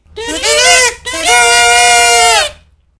Two Kazoo Fanfare
Two kazoos playing a similar fanfare in fifths harmony